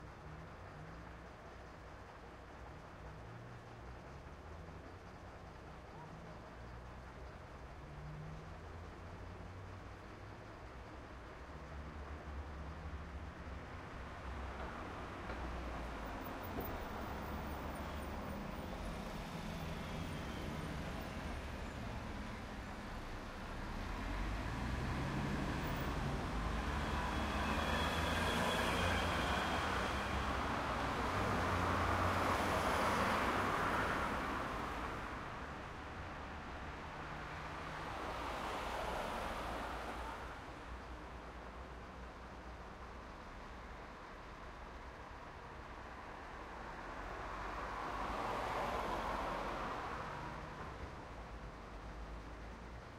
080808 30 BusStation RoadTraffic
waiting on bus station with diesel lorry on the right
bus, traffic